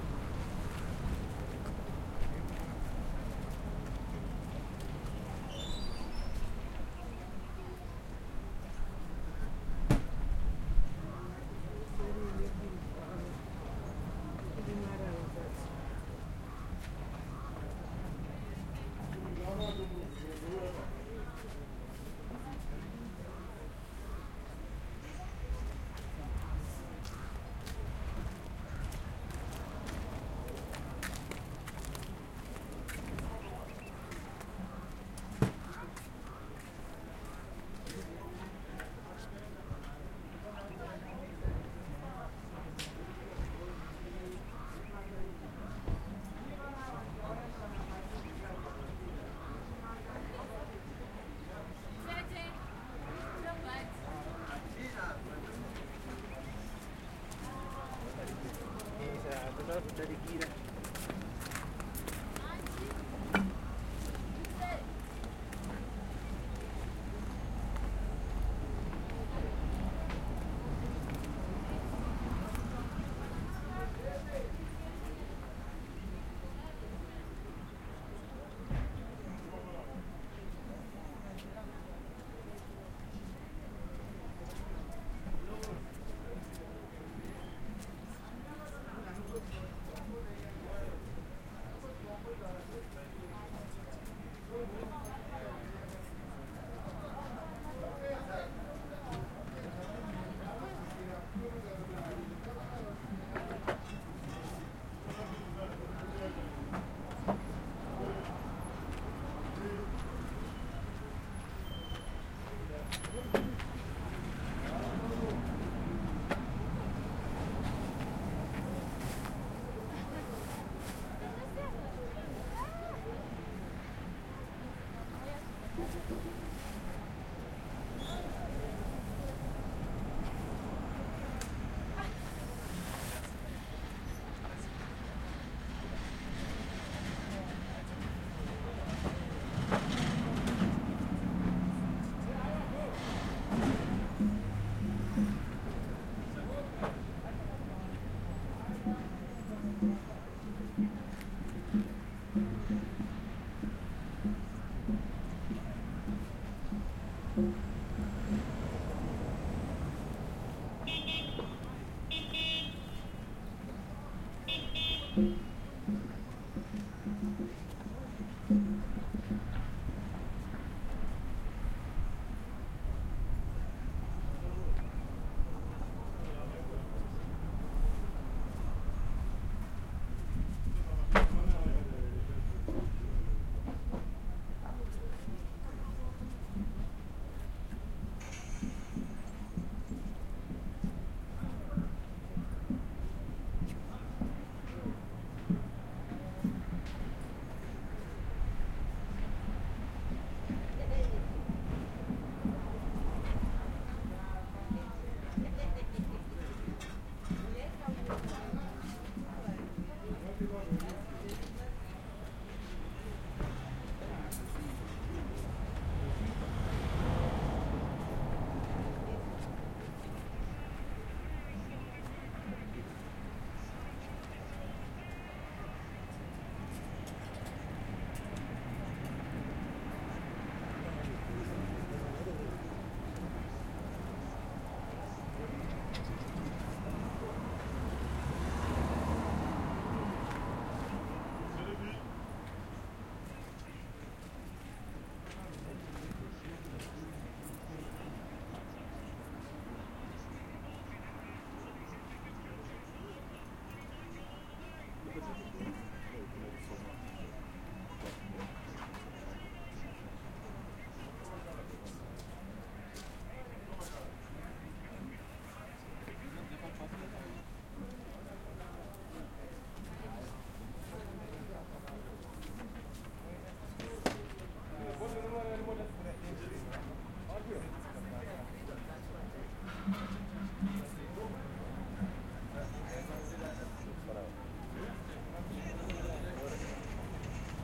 Suburban Shopping Centre Zimbabwe
Recorded on Zoom 4n
Ambience outside Avondale Flea Market in Harare, Zimbabwe
suburbs, field-recording